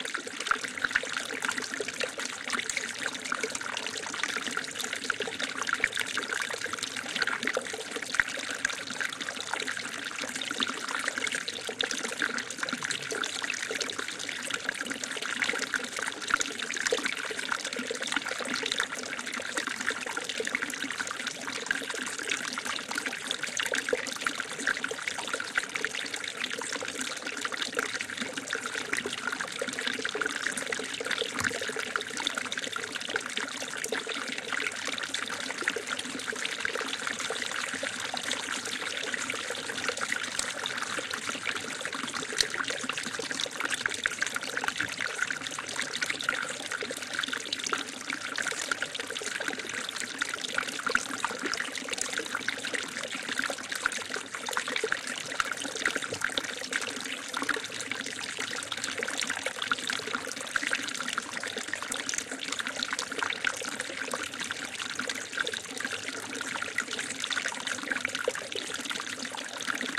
River Flow Loop
ambient, babbling, bubbling, flowing, meditative, relaxation, relaxing, river, splash, stream, trickle, trickling